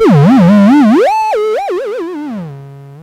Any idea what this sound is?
ET-1PitchMadness01
Crazily pitched note hit. Recorded from a circuit bent Casio PT-1 (called ET-1).
bent, circuit, circuitbent, lofi, pitch